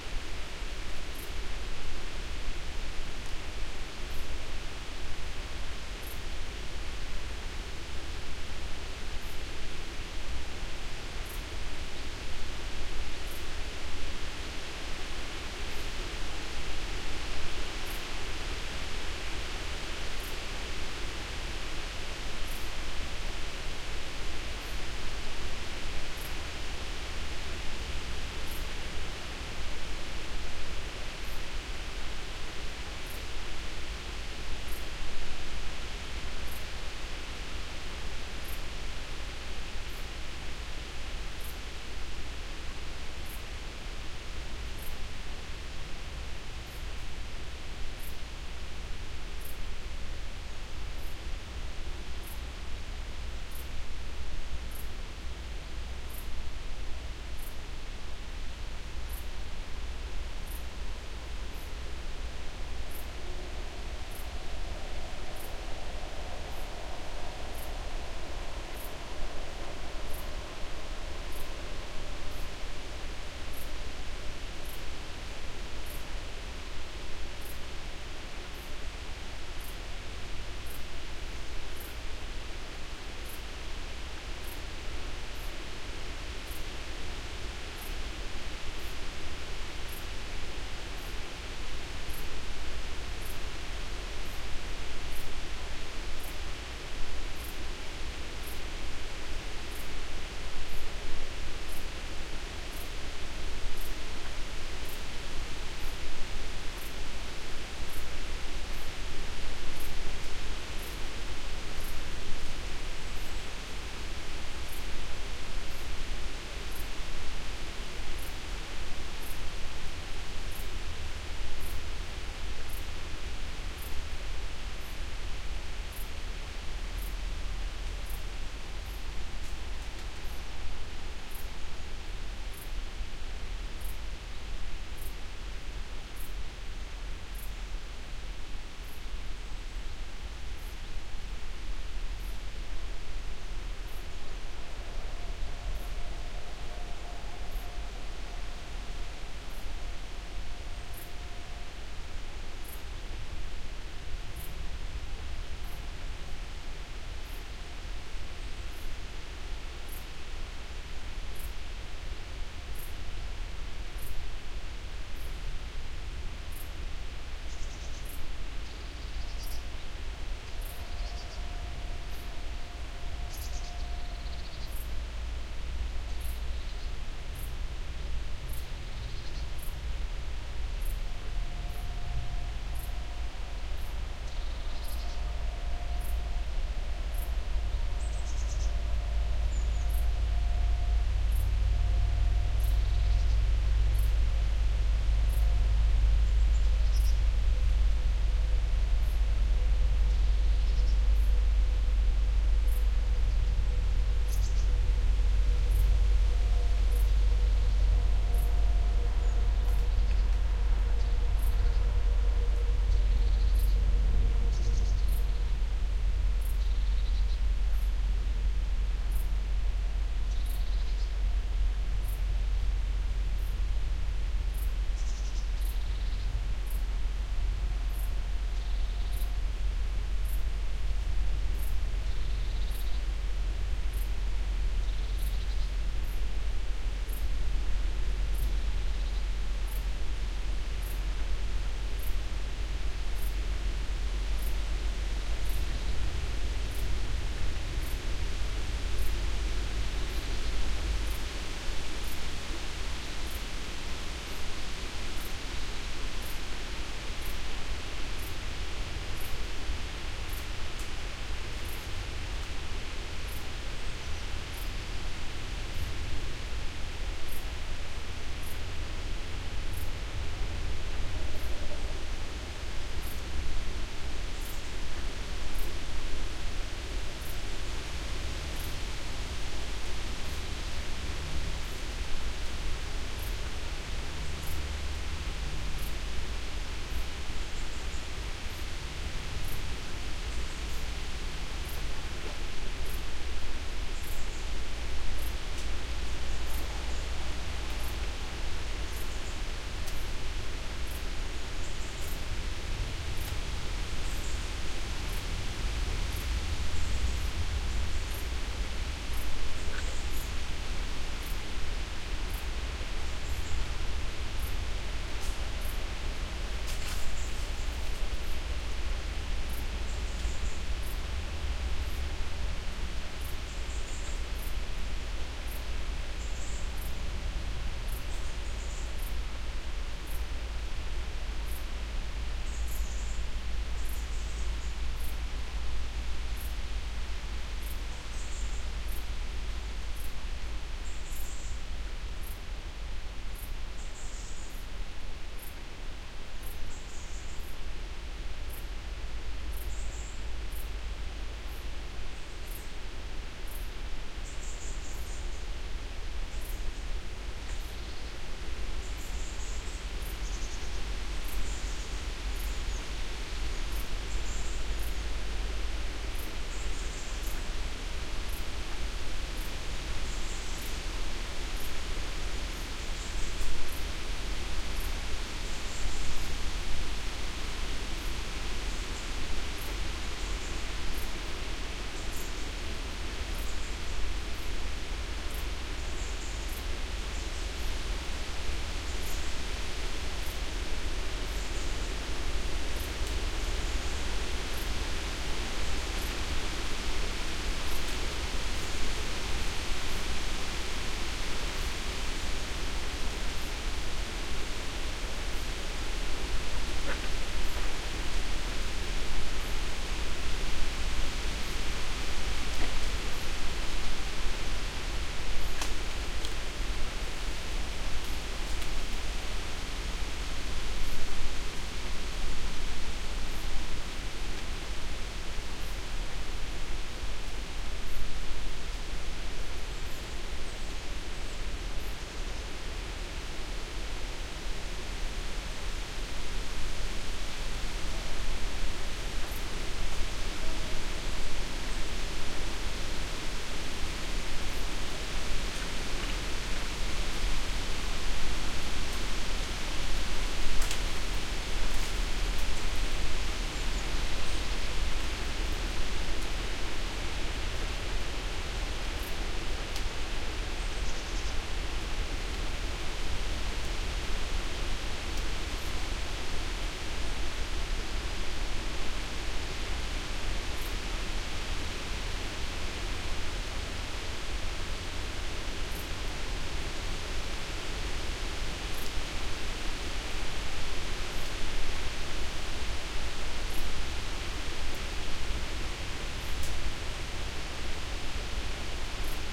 Strange..in the spring I have been near this place to do some recording and once again it was pretty windy. This recording was done at the end of September 2009, using two AT3032 microphones into an Oade modified FR-2LE recorder.
autumn, field-recording, forest, wind